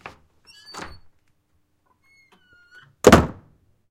A heavy wooden door for a theatre control room being opened quickly and then closing on it's own at a heavy, faster pace